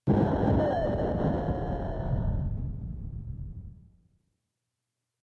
sound made from my yamaha psr